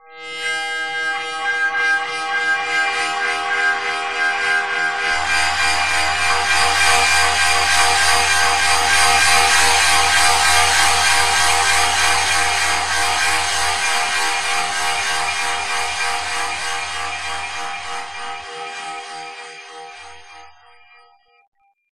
motorgn M48 10 alt 01
My dad had an old beat-up mouth organ, which was double tuned, in micro-tones to give a shimmering vibrato effect. I loved to just explore the overtones, and recompose them to make complex chords.
chord, mouth-organ, processed